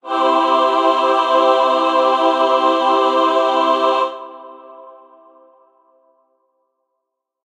This is an heavenly choir singing. (Like you know when something good happens in a movie.) Sorry, this is weirdly named.
ahh, angel, choir, heaven